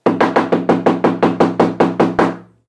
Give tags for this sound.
door
front-door
knocking
pound

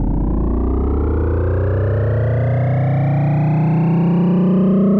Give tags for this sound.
spacepod
space
rising
spaceship
liftoff

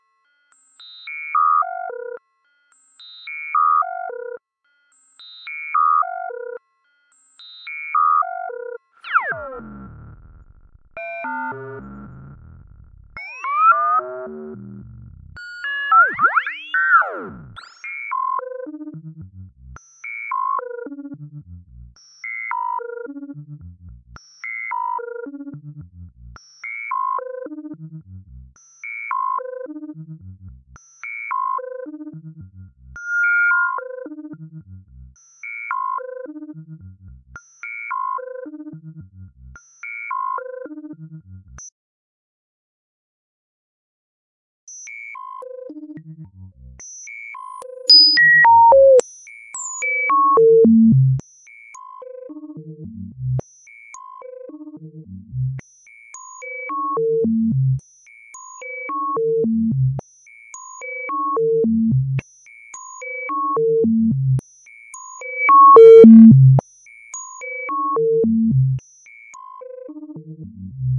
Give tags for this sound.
weird
sequence
synthi
sound-design
glitch
synthesis

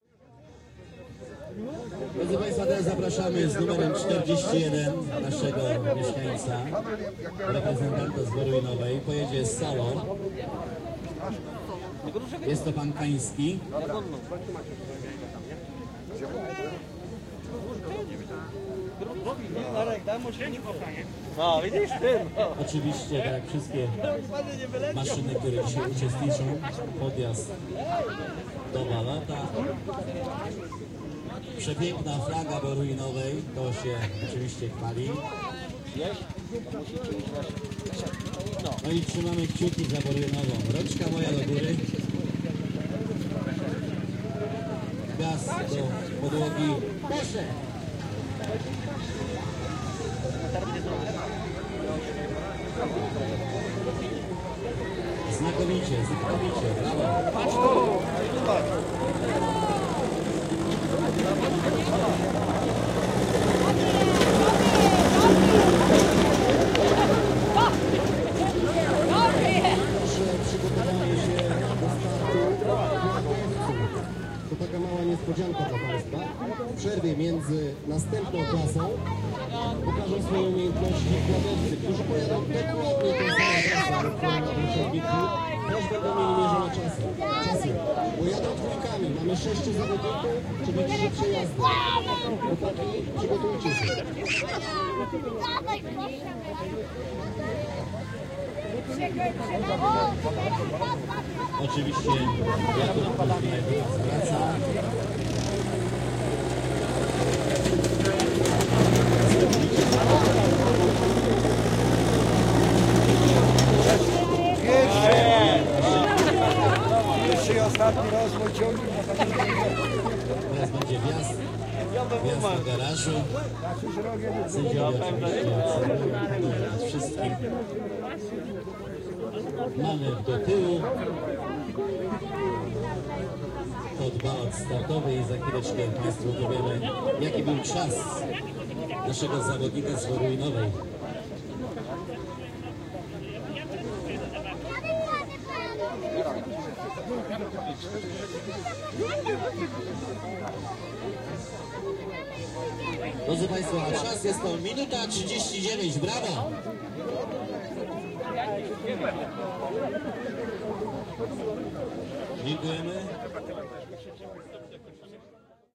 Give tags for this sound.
crowd
fieldrecording
machine
noise
o
Poland
race
rurak
ska
tractor
village
WIelkopolska
Wola-Jab

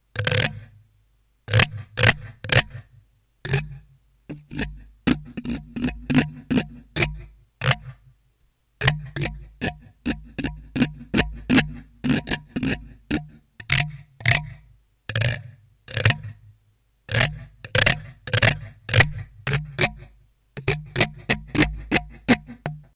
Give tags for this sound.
spare-sounds
building-elements
toolbox

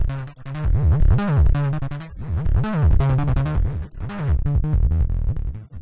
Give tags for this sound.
small
k
e
processed
love
h
thumb
pink

